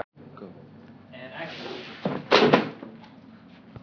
Books going across the table
book, able, across